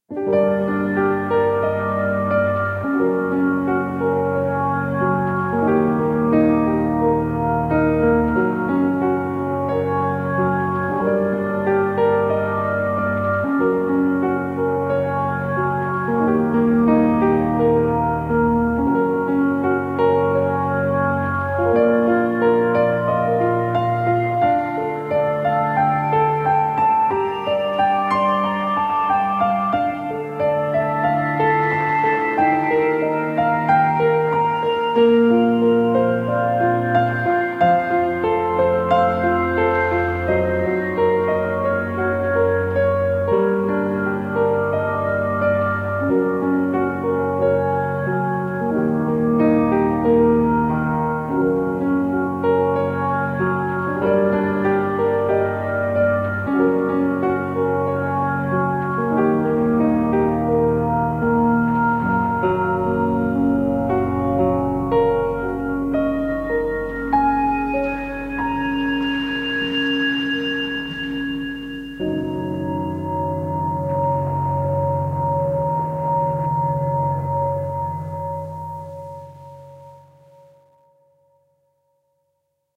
Chonology of love
This melody came up to my mind when I was exploring different Pad sounds over piano.
atmosphere, piano, drama, hope, pad, movie, love, music, sad, cinematic, theme, emotional, dramatic, film, romantic